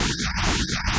Made from a fractal with image synth.
image, space, synth